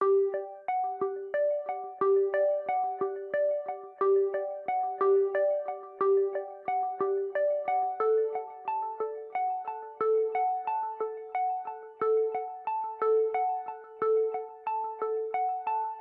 quiz game music loop BPM 90
This is a music loop for quiz games, loading screens, etc.
BPM 90
Made in iOS app Animoog.
You can use my sounds freely.
atmosphere, arp, quiz, ambience, ambient, games, atmos, synth